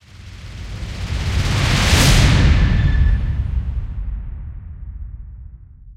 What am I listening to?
Cinematic impact 01
Cinematic powerful impact sound effect for your film, trailer, indent, logo, intro, blockbuster, video project, TV, Yotube video, website.
blockbuster, Cinematic, dramatic, epic, film, ident, impact, intense, logo, media, news, project, trailer, TV, video, videos, website